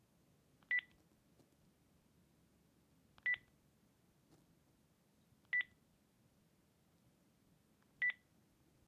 Electronic telephone, button press with beep
Cordless electronic telephone button press with beep
beep, button, cordless, dial, electronic, handset, phone, telephone